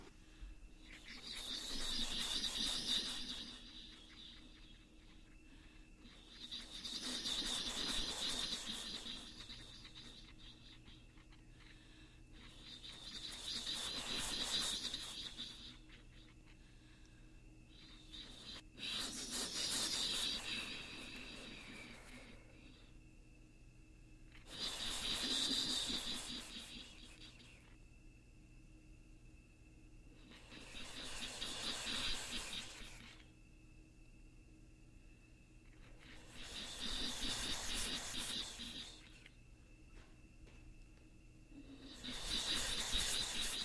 What do you get when you mix the waves of the ocean and the sound of a train? Play this sound clip and your curiosity will be answered.